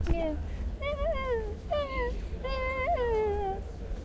AmCS JH ME27 huhuhuhu
Sound collected at Amsterdam Central Station as part of the Genetic Choir's Loop-Copy-Mutate project
Amsterdam, Meaning